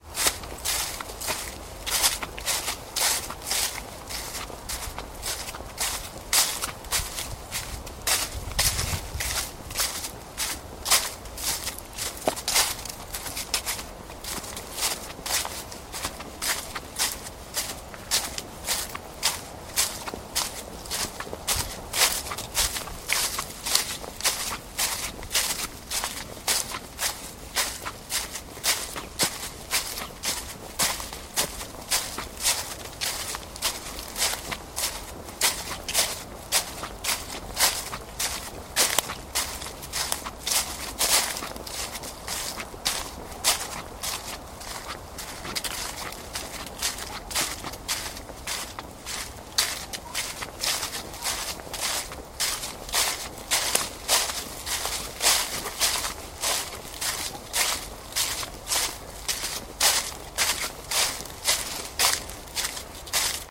Raw audio of footsteps through dry crunching leaves down a footpath.
An example of how you might credit is by putting this in the description/credits: